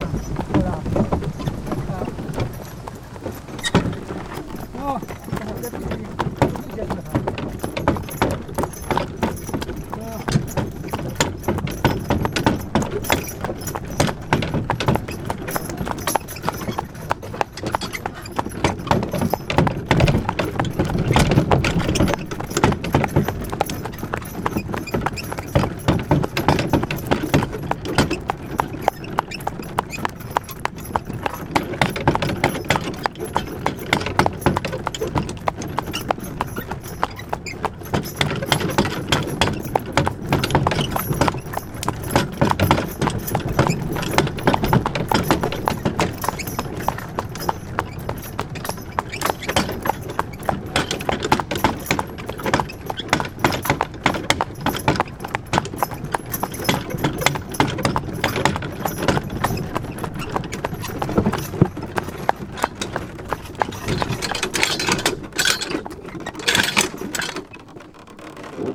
SE Horse & wagon with lots of wooden & metal rattle
Recorded from an old carriage.
rec equip - Sennheiser MKH 416, Tascam DR 680
carriage, horse